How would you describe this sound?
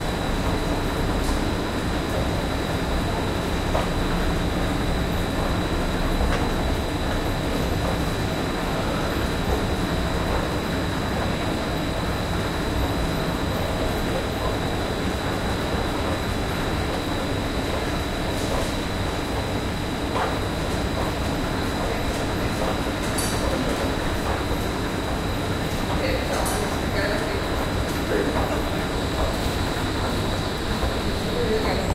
Loud mechanical escalators
Field recording of a set of mechanical escalators recorded on a Zoom h6 stereo mic (I think)
ambience, ambient, atmosphere, background-sound, escalator, field-recording, hum, machine, mechanical, motor, noise, squeak, squeal